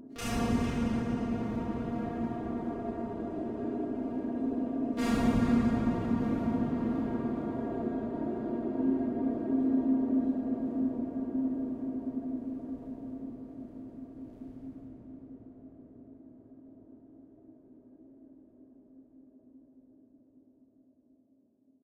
LAYERS 001 - Alien Artillery - A#3
LAYERS 001 - Alien Artillery is an extensive multisample package containing 73 samples covering C0 till C6. The key name is included in the sample name. The sound of Alien Artillery is like an organic alien outer space soundscape. It was created using Kontakt 3 within Cubase.